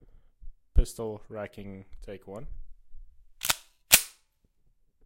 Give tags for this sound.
9mm; Chambering; Empty; Firearm; FX; Gun; handgun; Loading; OWI; Pistol; Racking

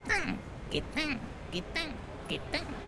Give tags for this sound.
Amsterdam; Central-Station

